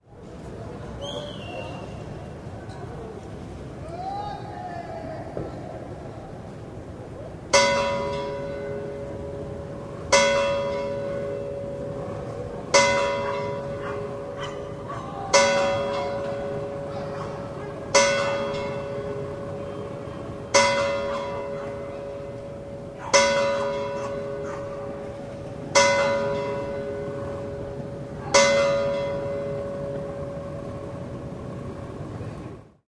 bells SMP distant
Bells of the small church of Sant Marti de Provençals (Barcelona). Recorded from a near and reverberant park, with MD Sony MZ-R30 & ECM-929LT microphone.
bells, small-church, barcelona